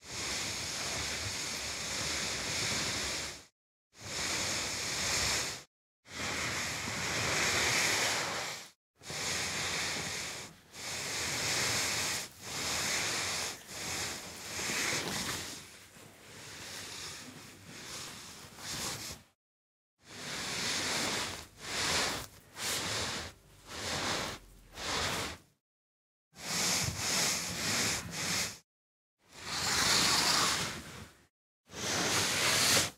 Dragging an object in carpet. Location recording of a dummy being dragged around a flat. Close range recording, stuck a radio mic on it. Mic: Sanken cos11 omnidirectional, Zoom F8 recorder.